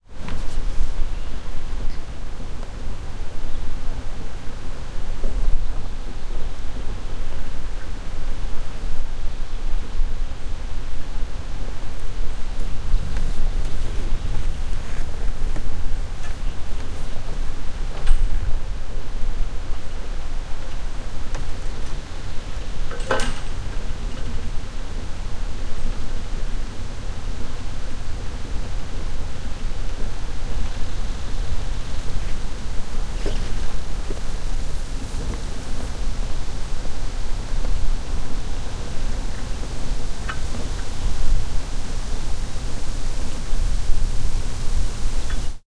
Recorded with Sony PCM-D50 in June 2014 on the cableway in the Carpathians, Ukraine.
sony, nature, birds, ropeway, ambience, PCM-D50, summer, Karpaty, field-recording, ambiance, stream, Carpathians, Ukraine, Ski-lift, ambient, cableway, forest, water